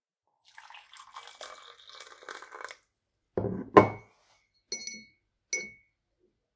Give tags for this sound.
coffee; cup; drink; glass; liquid; mug; pour; pouring; tea; water

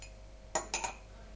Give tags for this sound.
kitchen; spoon; tinkle

